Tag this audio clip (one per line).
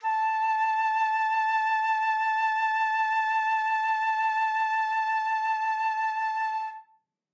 woodwinds
multisample
a5
single-note
vsco-2
midi-velocity-63